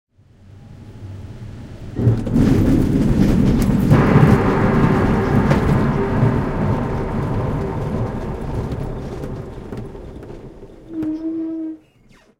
Sailplane Landing
touchdown of a sailplane, recorded onboard